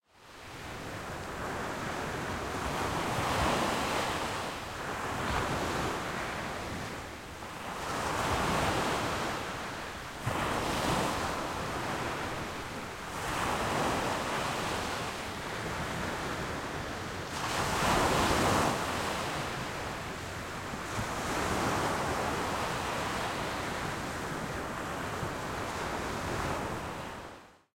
Recorded near Pattaya beach with Rode iXY a bit far away from the beach.
shore, water, waves, sea, beach